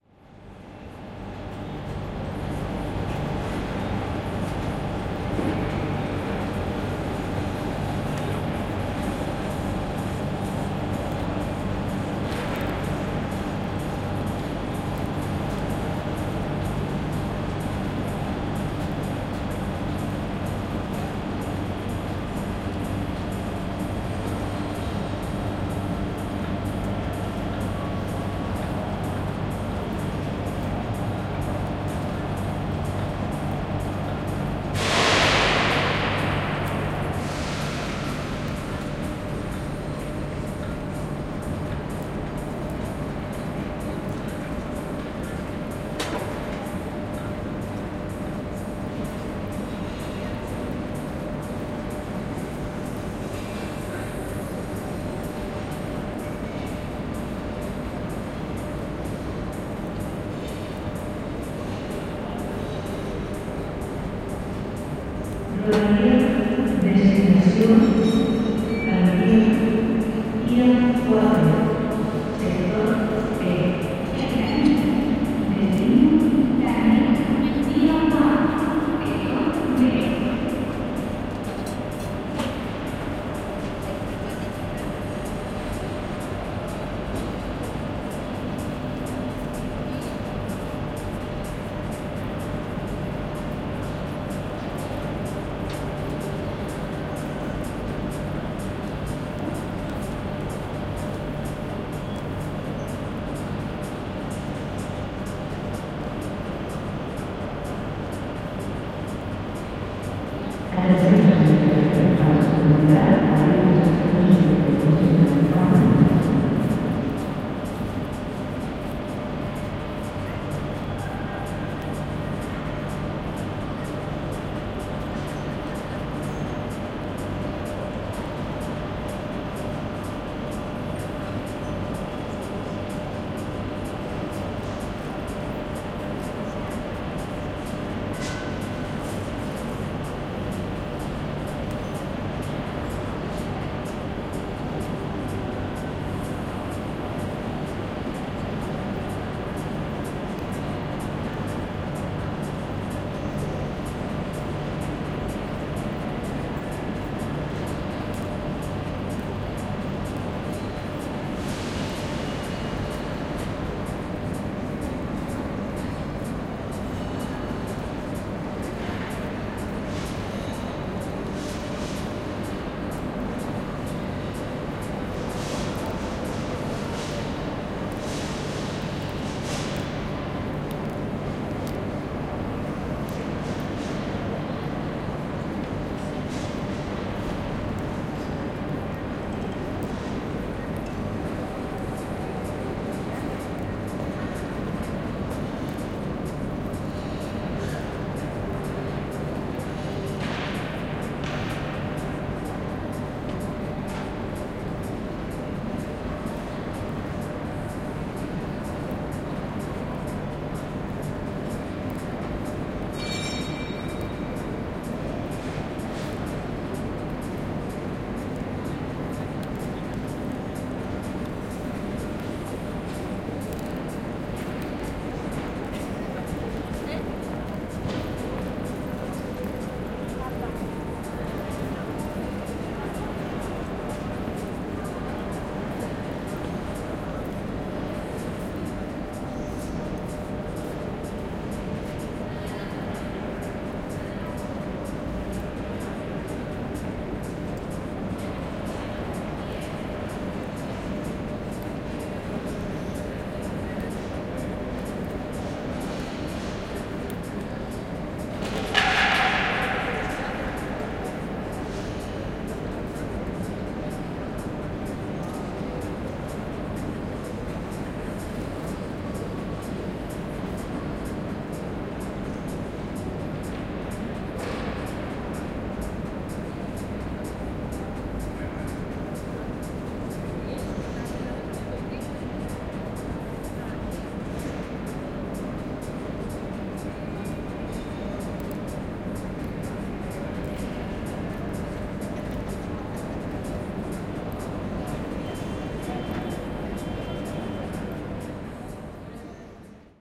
Shops at the Train Station
People buying in the shops in the train station
people, shopping, shop, station, store